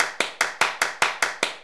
147 IDK drums 04
idk drums simple